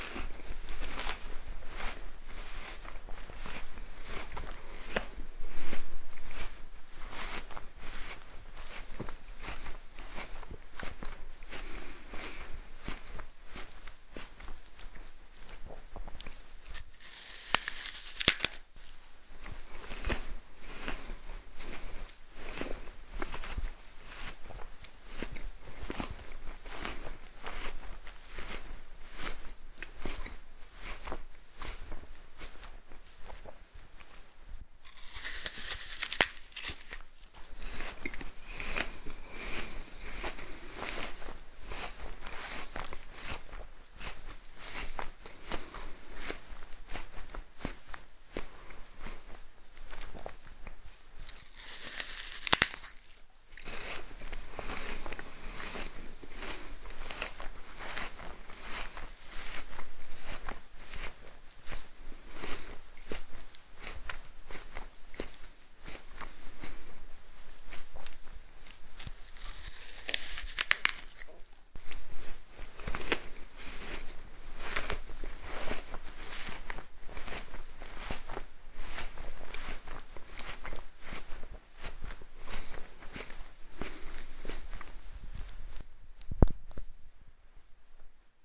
apple eating
Human eating apple